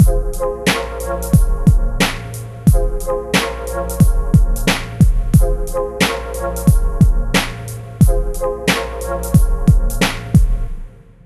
Made on FL studio 11
samples taken from "Producers Kit" downloaded separately.
Written and Produced by: Lord Mastereo
Keep it chaste!
1love_NLW